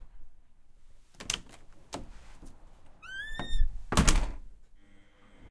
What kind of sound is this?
Door Open + Shut 1
A door opening then shutting
opening, door, A, then, shutting